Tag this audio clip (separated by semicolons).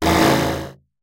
gameaudio; alert; shoot; audacity; audio; game